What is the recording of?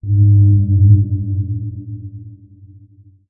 rnd moan2
Organic moan sound
Outdoors, Atmosphere, Creepy, Ambience, Horror